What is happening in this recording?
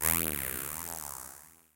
Moss Engine on the Korg Triton into Universal Audio Plug-Ins.
fm, hard, metallic, moss, synth, uad